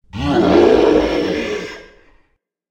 Monster Roar 8
monster roar scream evil villain